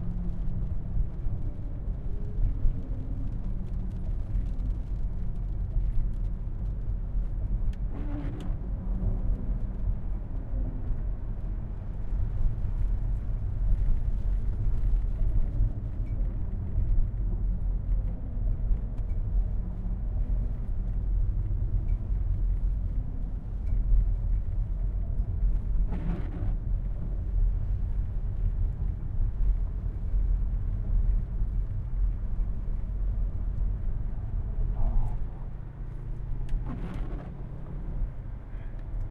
Ambience INT car drive traffic bridge audi quattro windows close rain drops on window (lisbon portugal)
Field Recording done with my Zoom H4n with its internal mics.
Created in 2017.
Ambience, audi, bridge, car, close, drive, drops, INT, lisbon, portugal, quattro, rain, traffic, window, windows